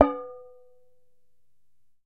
hit - peanut can 01
Striking an empty can of peanuts.
crashed, canister, whacked